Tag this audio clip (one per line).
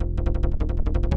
bass
loop